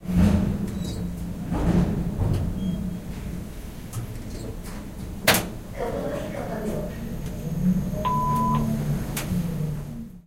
sound of a lift moving between floors
elevator, lift, move